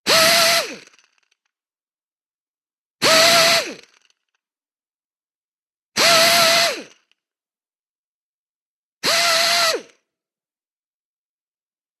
Straight die grinder - Atlas Copco g2412 - Start 4
Atlas Copco g2412 straight die grinder started four times.
4bar, atlas-copco, crafts, labor, pneumatic-tools, work